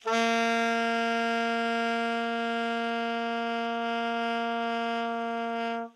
Alto Sax A#3
The A#3 note played on an alto sax
woodwind, sampled-instruments, alto-sax, sax, jazz, instrument, saxophone, music